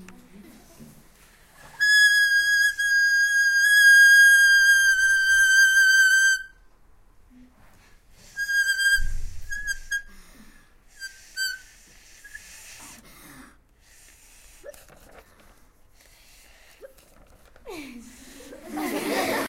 Sounds from objects that are beloved to the participant pupils at the Can Cladellas school in Palau-solità i Plegamans, Barcelona. The source of the sounds has to be guessed.